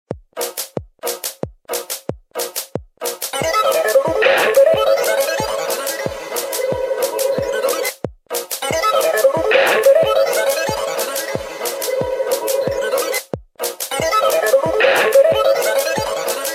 Plops reggaed
Funny, Plops, Reggae